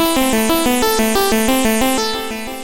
Ok, I am trying to make an epic 91 bpm neo classical instrumental and needed galloping synth triplets. This is what I got. Some were made with careless mistakes like the swing function turned up on the drum machine and the tempo was set to 89 on a few of the synth loops. This should result in a slight humanization and organic flavor.
loop, synth, 91, bpm